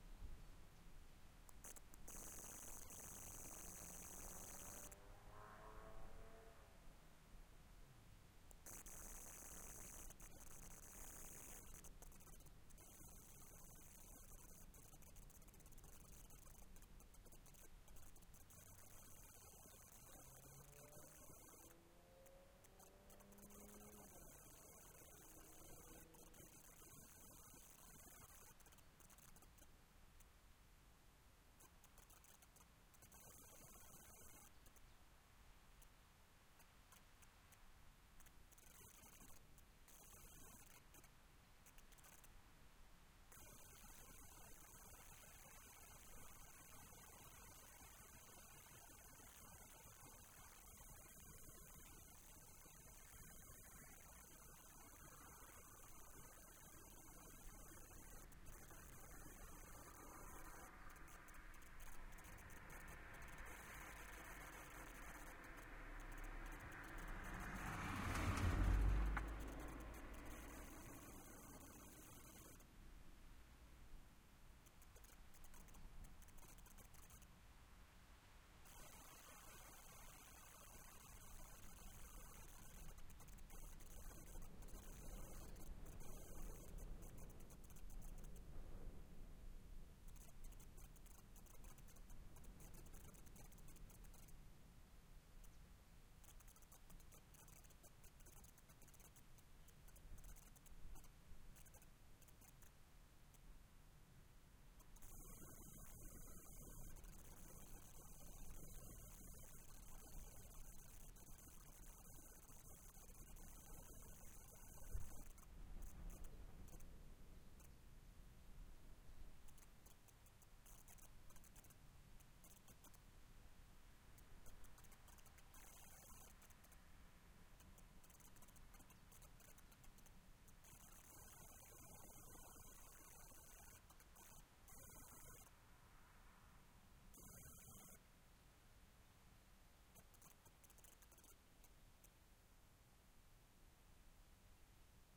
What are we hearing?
Interference WAVE Dropbox
Something went wrong with the audio! First I was going to record the sound of the wind. I placed the equipment, checked the audio and left. As I came back I realized that the audio file is full of interference!
First I was quite angry about it, but then I thought that it is a pretty good sound effect!